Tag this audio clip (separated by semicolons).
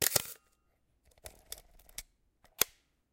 camera; click; film; foley; mechanical; pentax; photo; photography; shutter; small; wind